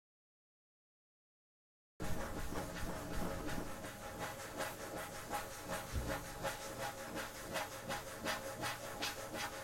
Flying saucer
CZ, Czech